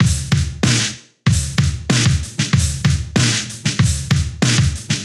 vintage hiphop drum sample 95bpm
Vintage Aesthetic Hip-Hop Drum Sample.
95bpm
Recorded myself playing, slowed down pitch, tempo-synced at 95bpm.
lofi, sample, 95bpm, hip-hop, lo-fi, aesthetic, loop, drum, Vintage, 2-bar